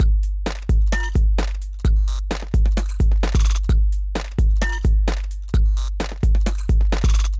Glitch Drumloop
Short drumloop with a glitchy feel in it.